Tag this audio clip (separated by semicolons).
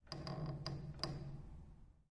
ambience; background; bench; creaks; hammer; keys; noise; pedal; pedal-press; piano; piano-bench; stereo